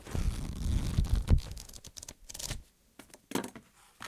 Slide and flipping through cards
cloth, fabric, hiss, metal, object, slide, swish